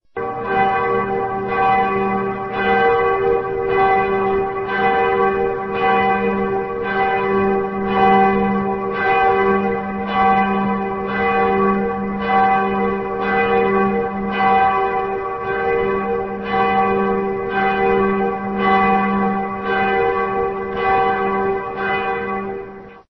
this is a Kölner dom bell :aveglocken.videotaped and edited to make it audio(record it the video myself with a blackberry phone!)